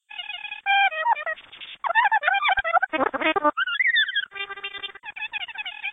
TV Audio 1
Cartoony TV sounds, similar to the ones in animal crossing
cartoon, freaky, sounddesign